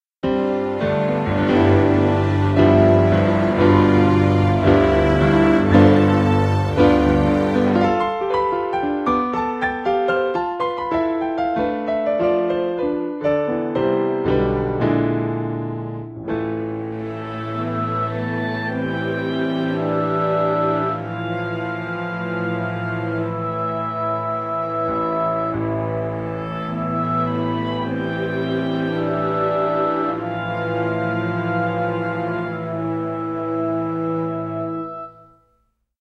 Although, I'm always interested in hearing new projects using this sample!